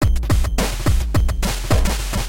omg-drums
break, breakbeat, drum, drum-loop, jungle, loop, milkytracker